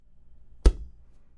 golpe, caída, animal
ca, da, golpe